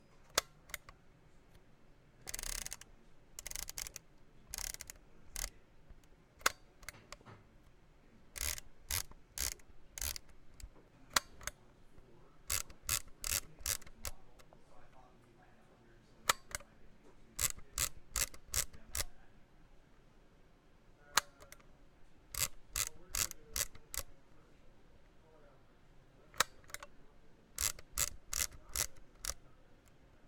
holga pinhole camera shutter
Winding and shooting a cheap Holga plastic pinhole camera.
holga, sound-museum, shutter, photography, whirr, raw, machine, sample, camera, historic, click, bluemoon